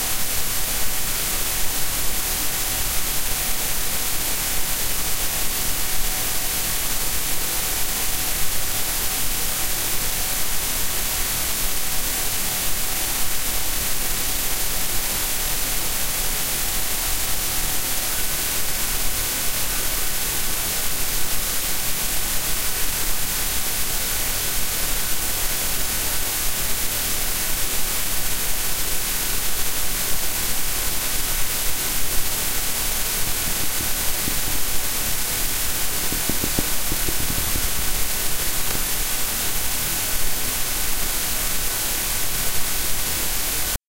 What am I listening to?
mac output noise
this is that horrible noise that comes out of the mac headphone jack recorded and amplified.
mac, noise, white, digital, jack